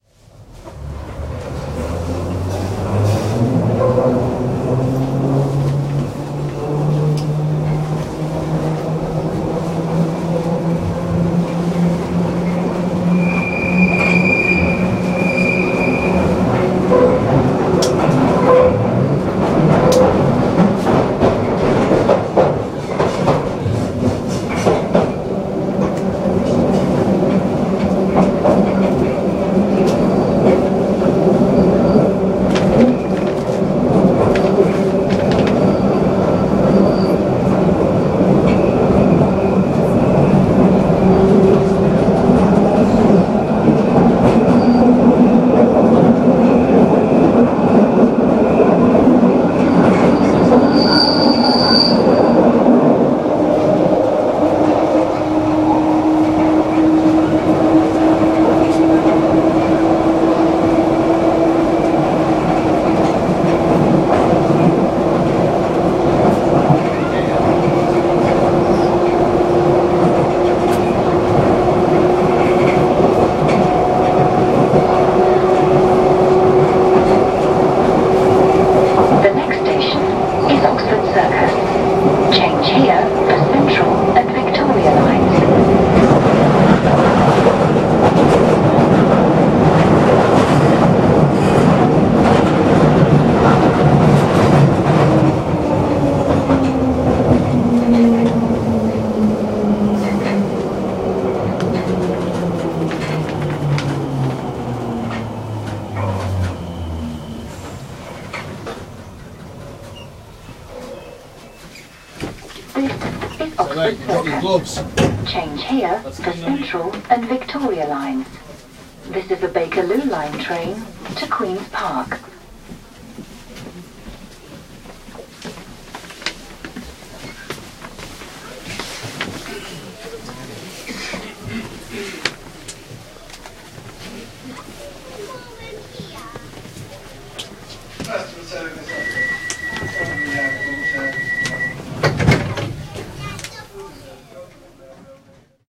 London Underground- one stop Bakerloo journey
Travelling between Piccadilly Circus and Oxford Circus on the Bakerloo line, London Underground. Some poor bloke left his gloves on the train- someone had to give him them! No I didn't miss my stop, it's just part of a longer journey to Baker St. Recorded 19th Feb 2015 with 4th-gen iPod touch. Edited with Audacity.
ambiance, ambience, announcement, arrive, bakerloo, bakerloo-line, beep, beeps, close, depart, doors, field-recording, forgot, gloves, london, london-underground, metro, open, oxford-circus, people, piccadilly-circus, station, subway, talk, train, tube, tube-station, tube-train, underground, voice